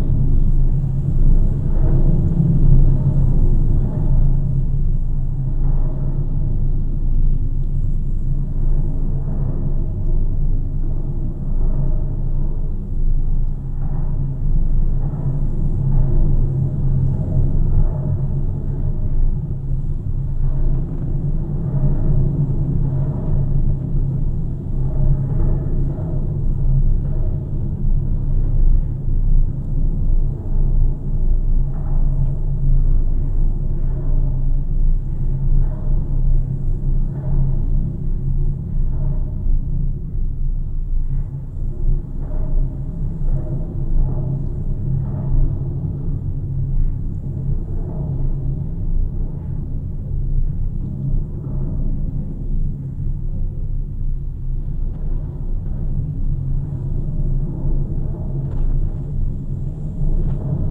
GGB A0209 suspender SE11SW
Contact mic recording of the Golden Gate Bridge in San Francisco, CA, USA at the southeast approach, suspender #11. Recorded October 18, 2009 using a Sony PCM-D50 recorder with Schertler DYN-E-SET wired mic.
cable, metal, Schertler, wikiGong